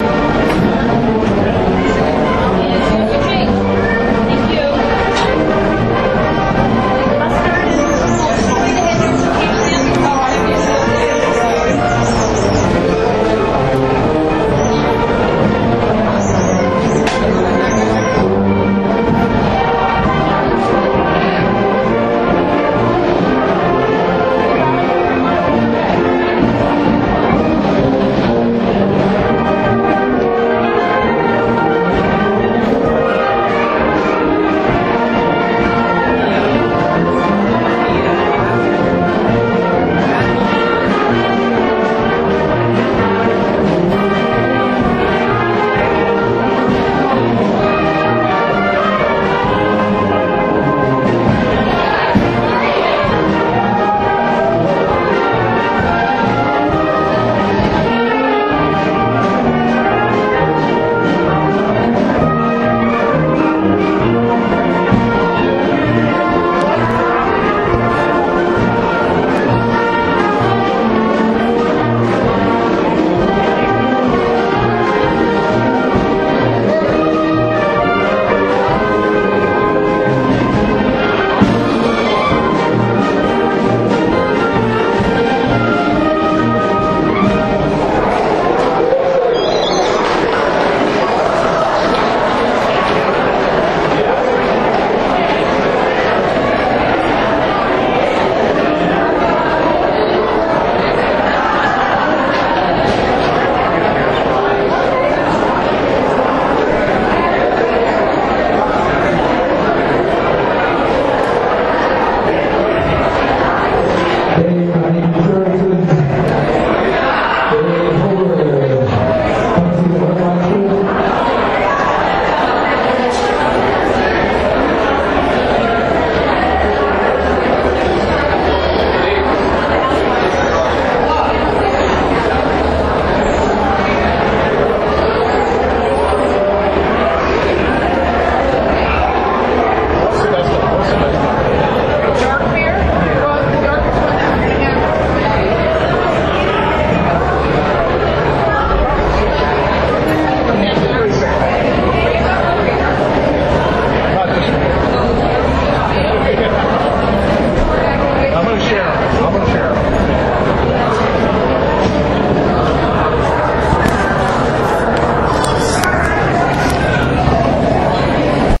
oompah pah
Nat sound recorded with an Olympus Pocket digital recorder at an Oktoberfest celebration in Huntington Beach California, USA.
sound, nat, oktoberfest